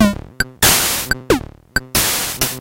ET-1DrumLoop02
A pitch mangled drum loop. Recorded from a circuit bent Casio PT-1 (called ET-1).
bent, circuit